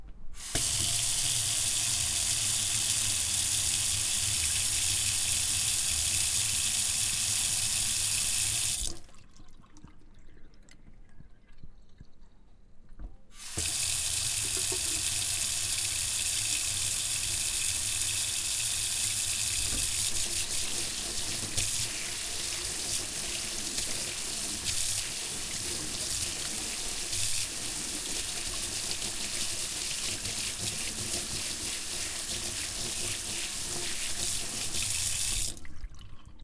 I opened the tap over a metal sink, closed the tap again. Did that a second time and played with the water a bit like washing hands.